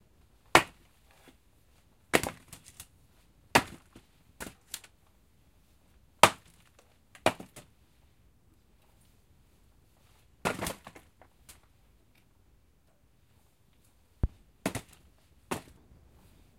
logs being thrown into basket
interior, logs, autumn